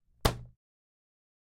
Golpe en madera
hit in wood sound
environment, hit